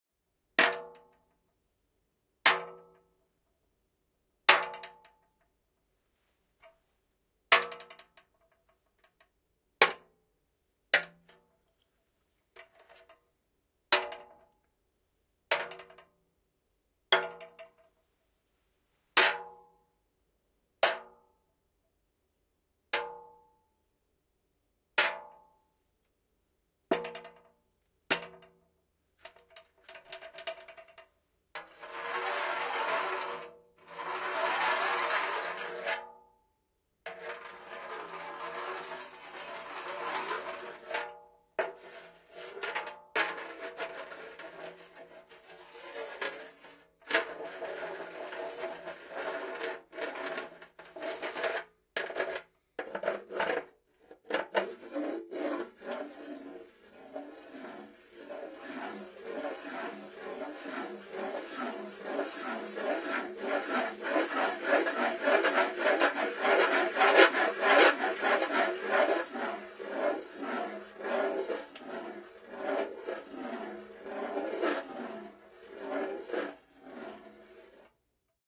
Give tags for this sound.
hit; impact; metal

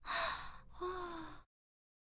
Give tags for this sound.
Girl; sigh; surprise; voice

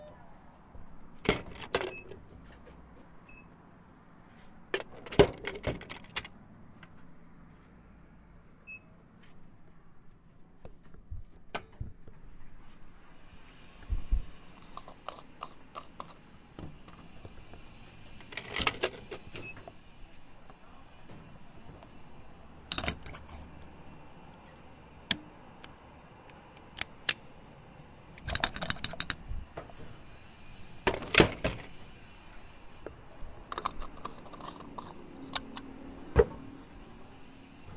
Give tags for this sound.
nozzle; petrol

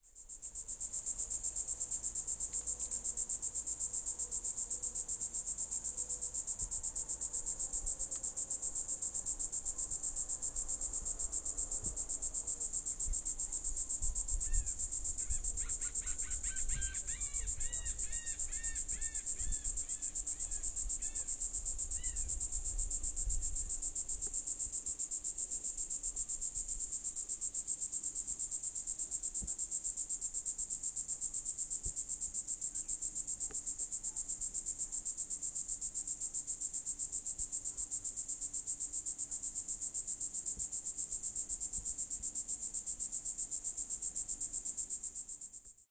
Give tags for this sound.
cicada; insect; insects; cicadas; nature

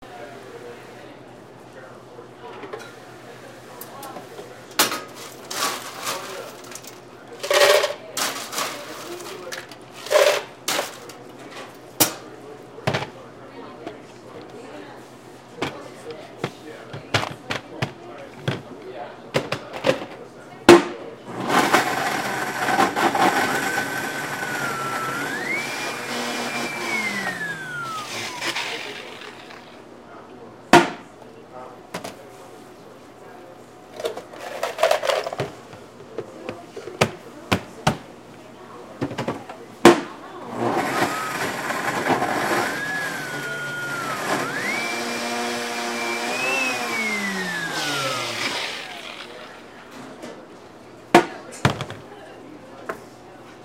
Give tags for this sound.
ice; coffee; shop; blender

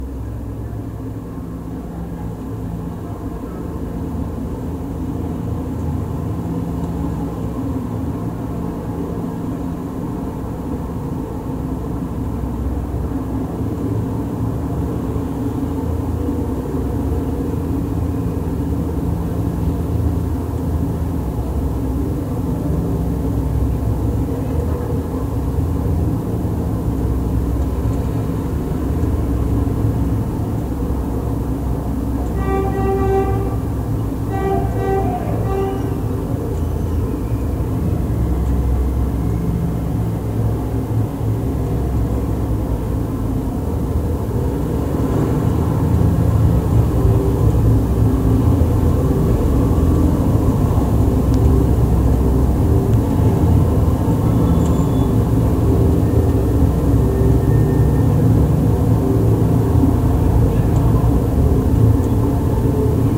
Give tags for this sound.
schertler; sony-pcm-d50; Gamla-Stan; wikiGong; media; stockholm; sculpture; sample; field-recording; sweden; bronze; DYN-E-SET; soundwalk

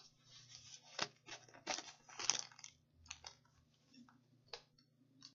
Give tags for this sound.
wallet; leather; currency; money

